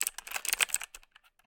A burst of fast touch typing on a computer keyboard with mechanical keys
button, click, computer, key, keyboard, keypress, press